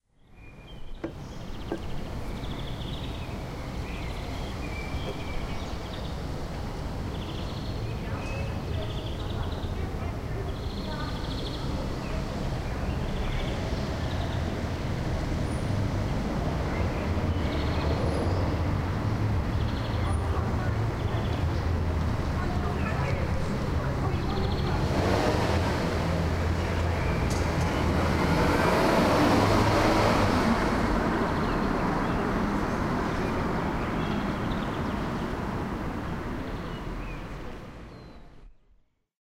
urban neighbourhood
recorded in germany, magdeburg out of a window on the third floor at afternoon.
some people talking, birds are tweeting and a bus is driving by.
atmosphere, bus, car, cars, neighbourhood, people, talking, tweet, urban